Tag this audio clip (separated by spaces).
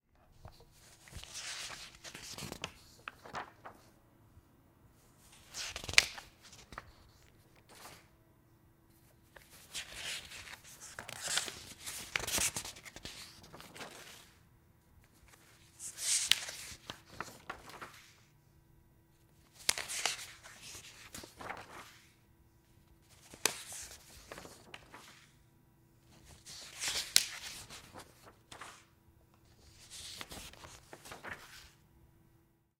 read newspaper turn turning magazine flip books pages reading page paper book